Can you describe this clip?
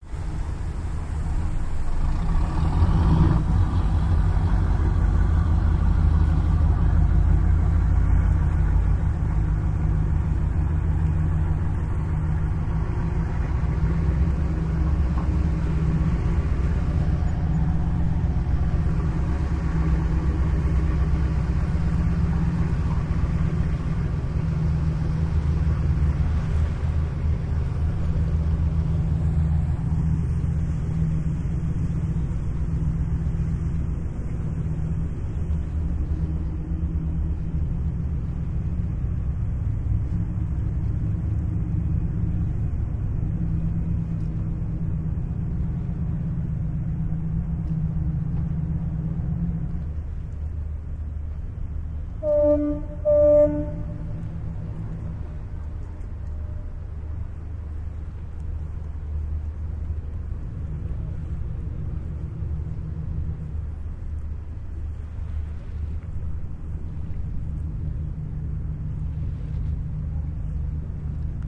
This is a sound of a boat moving away from hyderpasa station on Asian side of Istanbul, Turkey.